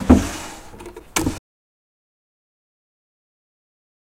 sliding object in draw
opening of the draw and an object slides in the draw.